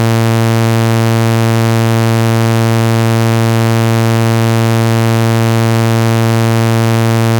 Osc1 Saw 220hz
Arp 2600 Saw @ (allmost) 220 hz.
clean, oscillator